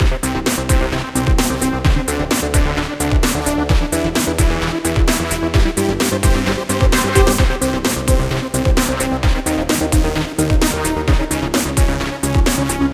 Feeling Spacey
A loop brought to you from space.
This track was produced using FL Studio, mainly with reFX Nexus and a few modified drum samples.
130-bpm, drum, drum-loop, beat, loop, space